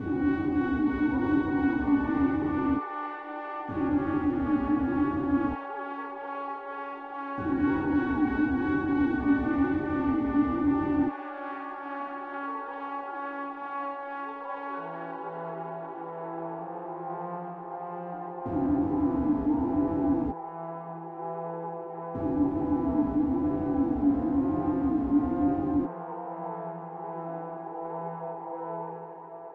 air ambience could be used ona movie or a song intro or watevers clever cheers!
ableton-live, synth-air, synth-brass